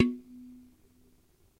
Sch 03 stumpf
Dark kitchen bowl
percussion, kitchen, bowl